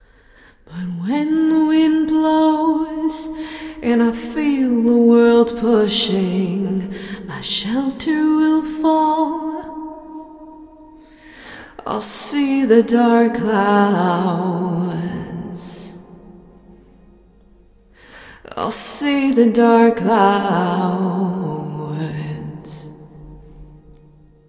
female vocal, "dark clouds"
Another vocal clip from my song "the upward swing".
Recorded in Ardour with the UA4FX interface and the the t.bone sct 2000 mic.
woman, female-vocal, singing, female, dark